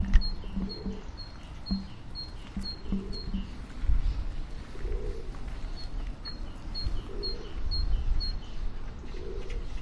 mallerenga-carbonera DM
Parus Major - Mallerenga Carbonera - Carbonero Común - Great Tit
Recorded with Zoom H1
Date 3/11/17
great-tit, bird, montjuich, sonsurbansnatura, phonos